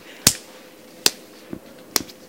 Real life punching of skin